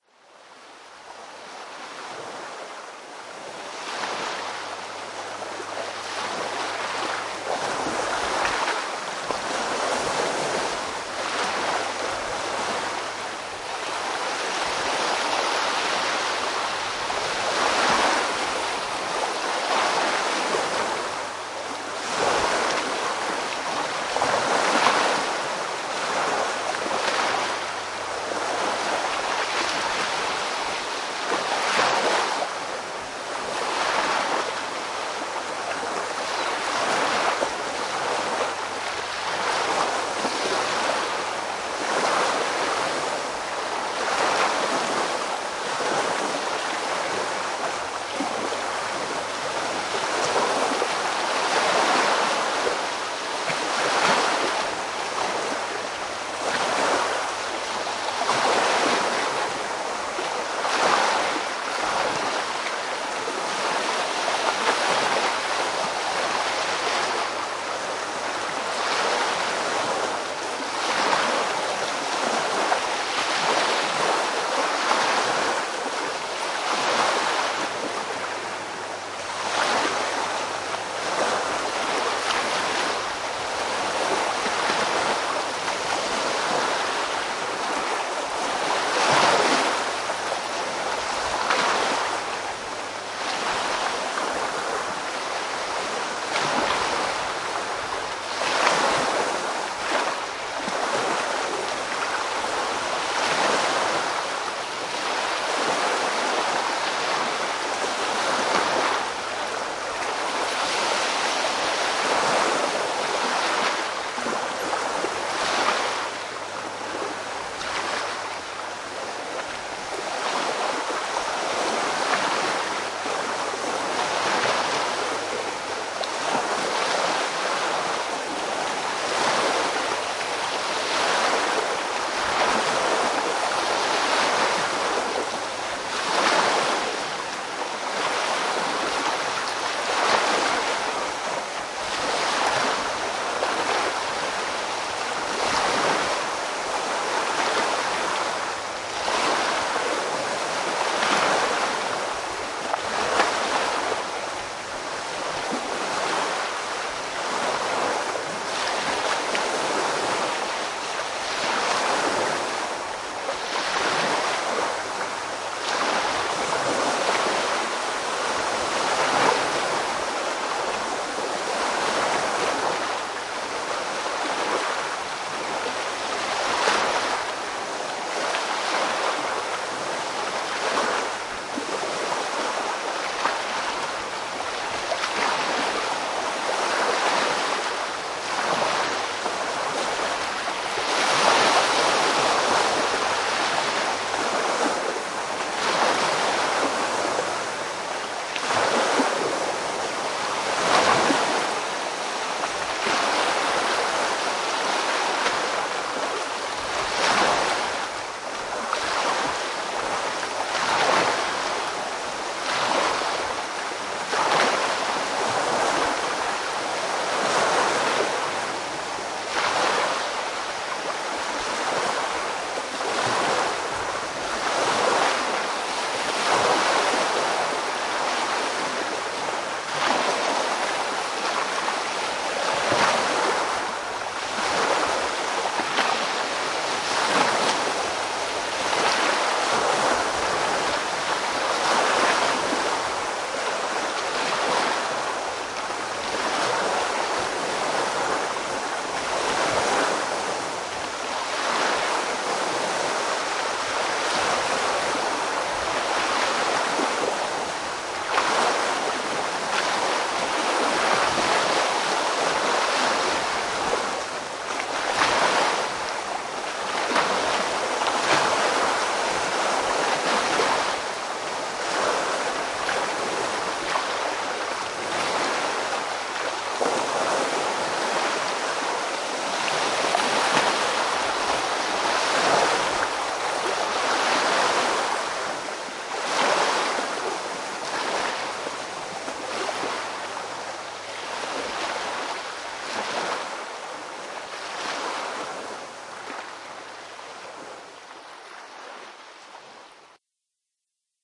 Recording of waves splashing on the shore of Loch Ness in Lower Foyers.